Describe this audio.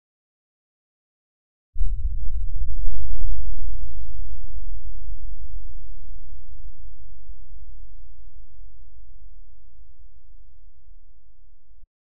distant explosion
A low-pitch thud, kind of reminds me of a nuke going off far away in the distance.
Found in my files. Most likely a granular stretch of some noise recorded in soundbooth. Judging by L/R split was recorded in stereo with a pair of Apex condenser mics.
distant, nuke, thuds